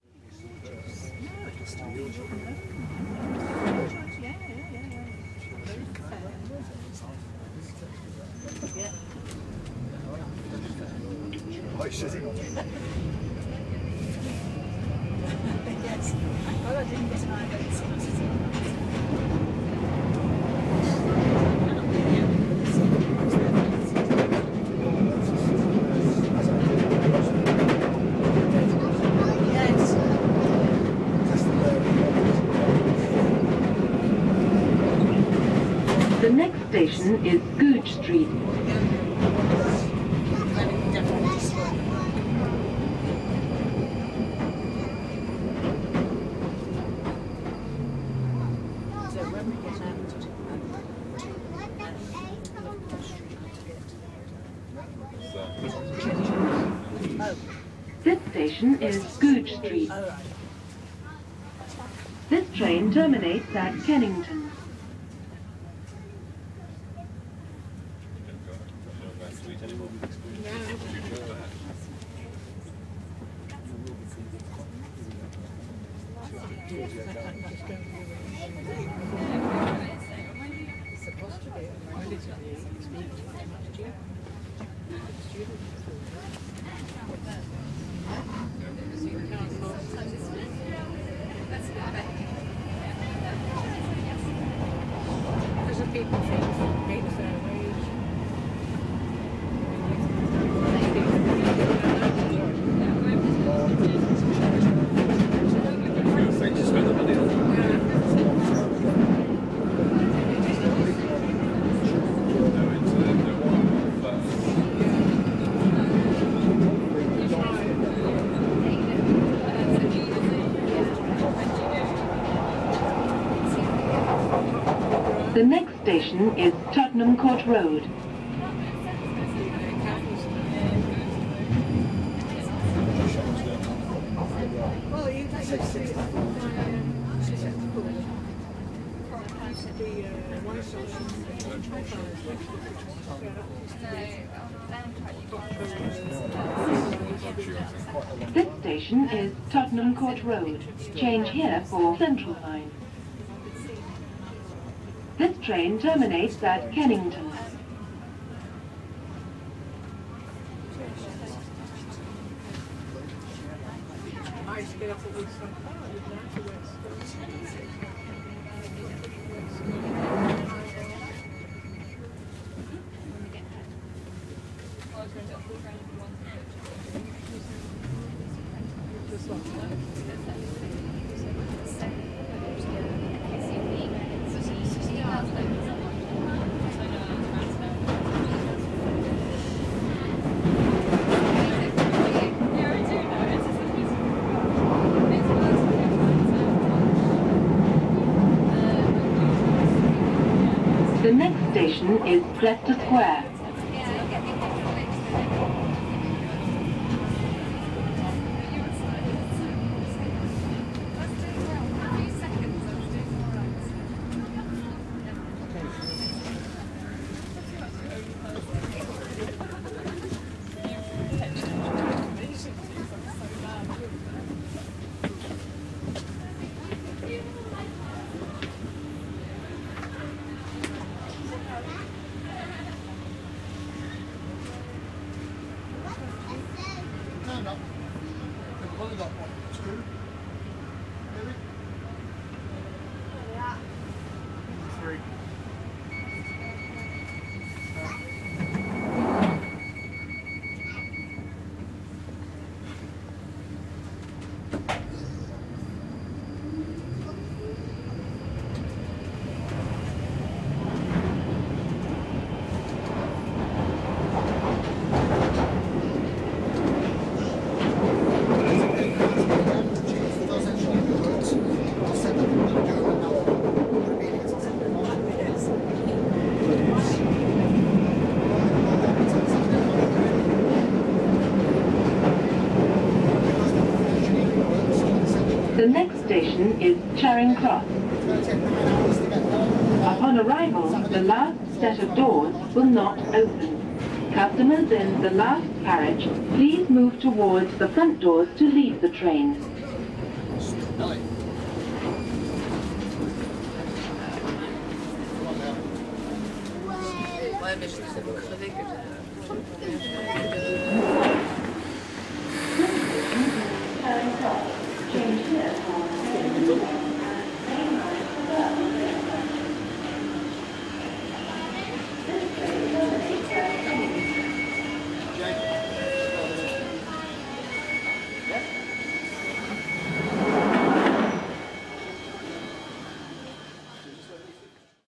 A mid-day journey on the Underground's Northern Line. Goes from Euston to Charing Cross stations, stopping at Goodge Street, Tottenham Court Road and Leicester Square stations. Train arrives and departs from stations, opening doors and closing doors, in-train announcements. Recorded 19th Feb 2015 with 4th-gen iPod touch. Edited with Audacity.